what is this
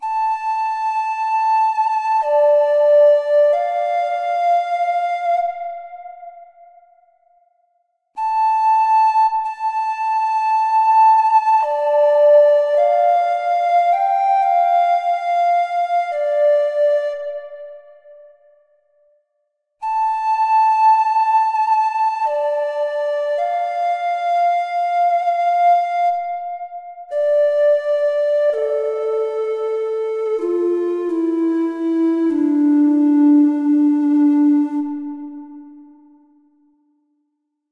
synth flute
A synthesised flute sample - really an attempt to emulate the fantastic native flute samples by freesounder kerri. Of course these synth versions do not compare to kerri's beautiful sounds but do come quite close to a realistic flute sound. The hard parts were getting the vibrato right and mixing a realistic amount of the flute's characteristic " breathiness". From my Emulated instruments sample pack.